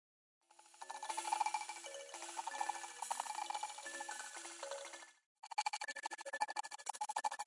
tinkling mbira-03
processed thumb piano
piano, processed, thumb